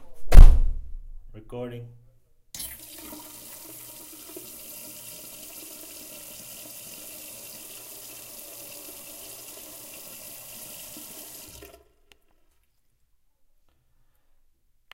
MySounds GWAEtoy tapandwater
field, recording, TCR